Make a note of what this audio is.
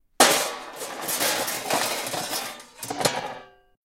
Metal Crash
Various metals clashing. Recorded with AKG-C414 microphone.
impact, crash, hit, bang, metal